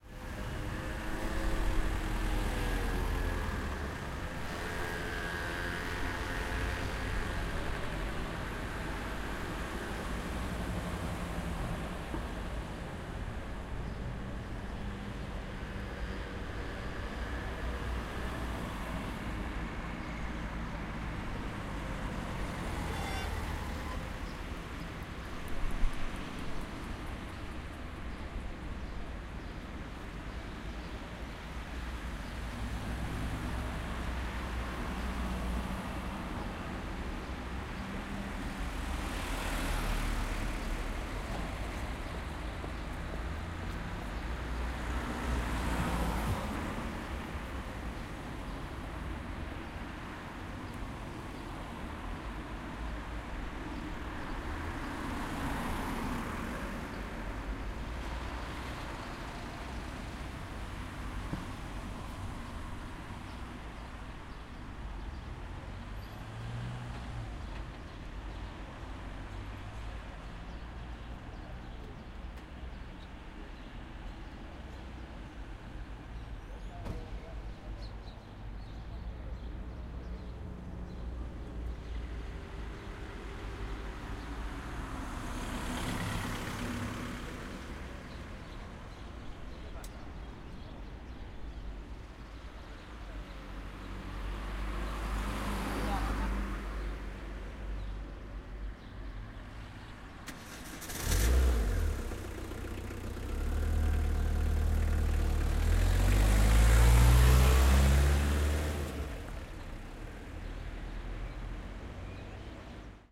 0193 Puente San Francisco
Traffic in a traffic circle roundabout. Birds and people talking Spanish in the background. Engine from a car
20120324
birds, caceres, car, door, engine, field-recording, footsteps, spain, traffic